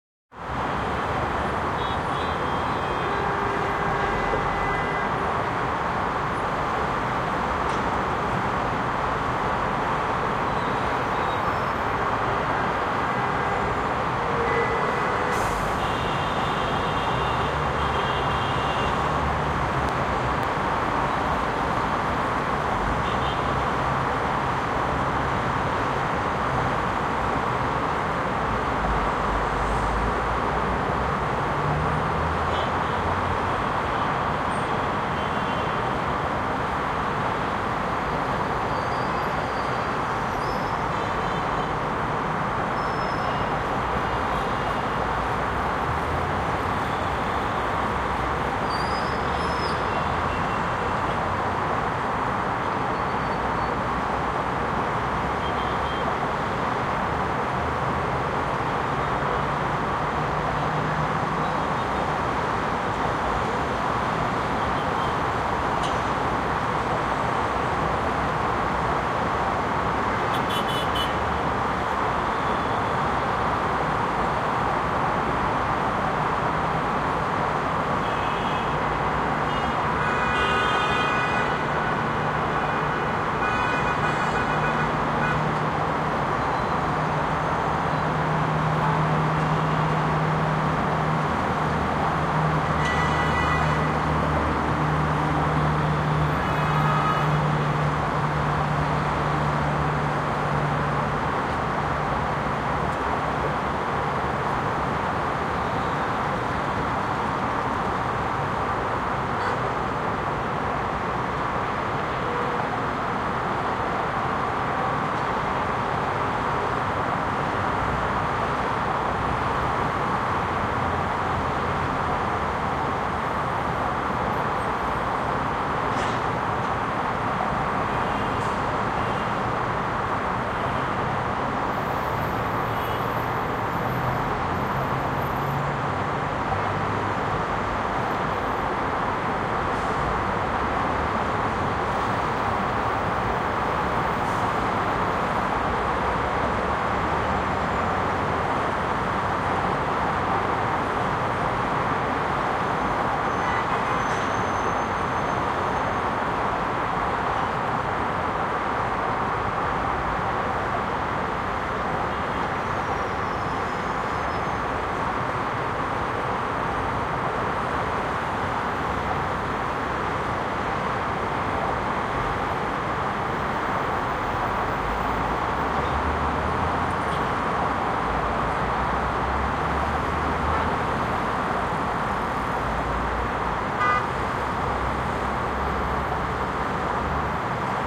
I visited Wuxi, China back in September of 2016 for work related outsourcing. The hotel room I stayed at was on the 15th floor and there was a major intersection right below. One afternoon two cars had a minor accident which blocked the intersection and there was hilarious amounts of honking at times.
Recorded with my Samsung S6 phone.
amb, ambiance, ambient, atmo, atmos, atmosphere, atmospheric, background, background-sound, China, noise, soundscape, traffic, Wuxi